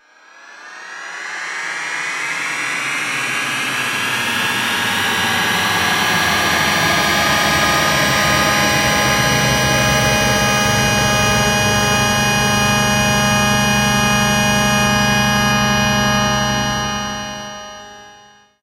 HTX sound II
The 100% genuine and original HTX sound in stereo :P
3 merged samples featuring 21 group-panned notes with portamento and plenty dialed up effets like chorus, delay and reverb, everything except external panning, digial DC-filtering and volumeramps are comming straight out of the Novation X-station.
Rumor has it that the core of the original THX-sound actually was programmed in Csound in case you want to recreate the original. A google search will give you the right pointer.
Fixed high-pass version without the DC and normalized. Individually packed for freshness.